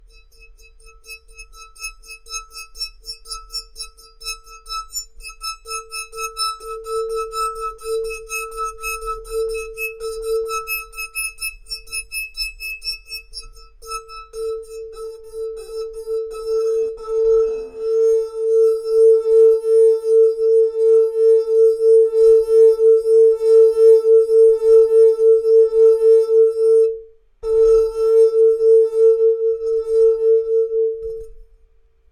Laargo crecendo
ring, clang, clink, tinkle, violin